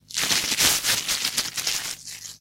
delphis FOLIE 3
Selfmade record sounds @ Home and edit with WaveLab6
crisp, crunch, fx, paper